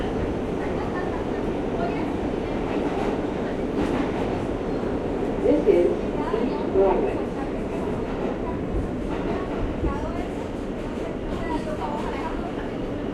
Subway station announcement, interior recording, female voice